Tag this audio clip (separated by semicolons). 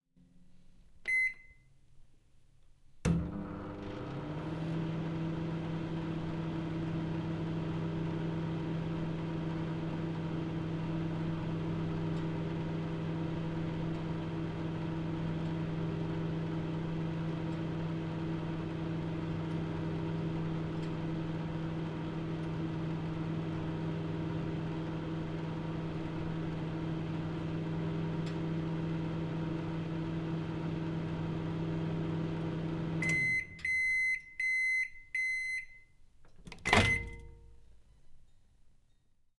housework; Czech; microwave; Panska; CZ; ZoomH5; bustle